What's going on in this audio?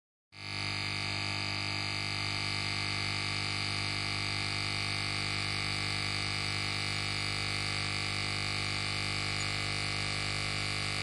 hair trimmer working